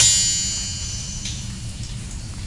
Cave chime
An old clock chime recorded in the Argen Alwed caves
cave, chimes, field-recording, clock